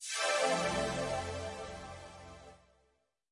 Swoosh FX Extra Short soft
Synth swooshing sound of a square wave. Suitable for intros or logos. Available in several intensities.
effect, filter, fx, intro, logo, sound, square, swoosh, synth, wave